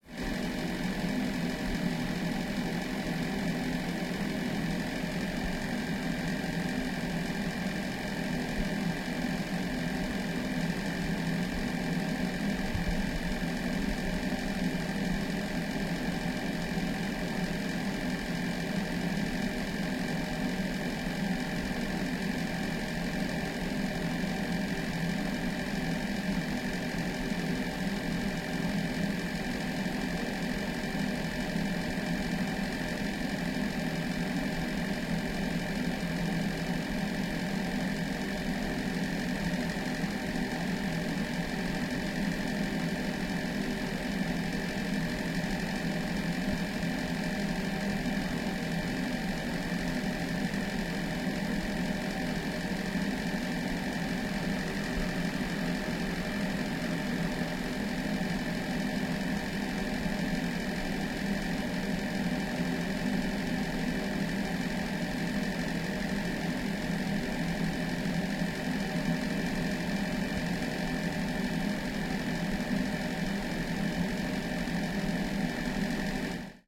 bathroom room tone with vent D100 ORTF
ambience, recording, static, room-tone, ambiance, bathroom, room